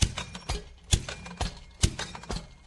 Machine loop 01
Various loops from a range of office, factory and industrial machinery. Useful background SFX loops